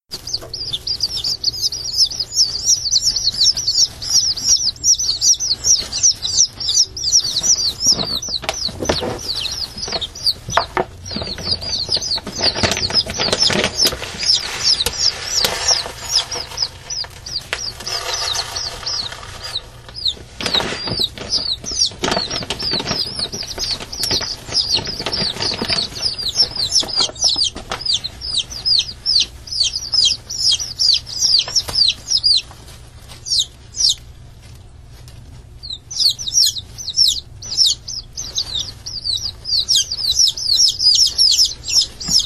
Chicks Chirp2
Bantam chicks chirping, recorded using an Olympus VN-6200PC digital voice recorder. This is an unedited file.
bantam, chick, chirp, peep